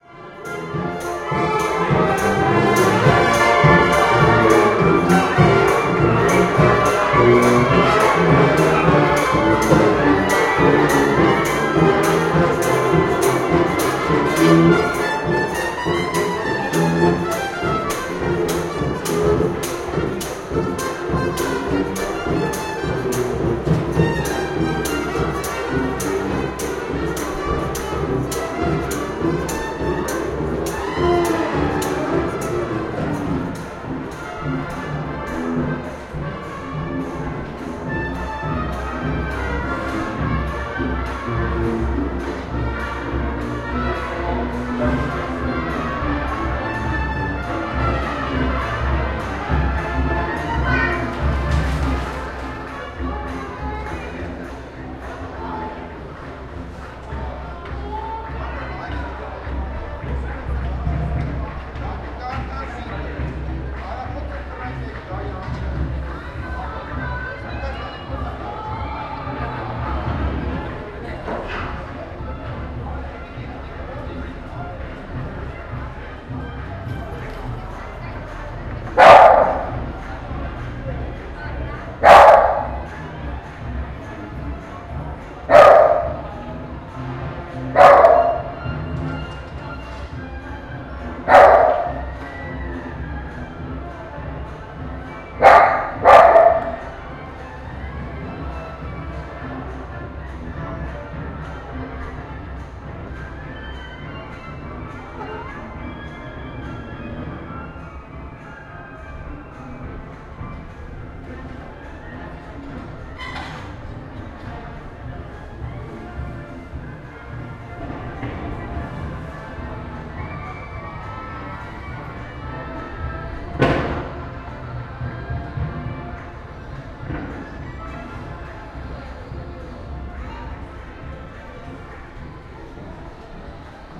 parada nowoorleńska 14.09.2018
14.09.2018: Wrocławska street in the center of Poznan (Poland). So called Neworlean Parade organised on occasion of Ponan Old Jazz Festival.